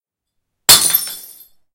Glass Smash, Bottle, D
Raw audio of dropping a glass bottle on a tiled floor.
An example of how you might credit is by putting this in the description/credits:
The sound was recorded using a "H1 Zoom V2 recorder" on 19th April 2016.